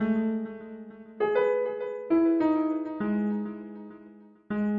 100 Gray Jazz pianos 04
bit, crushed, digital, dirty, synth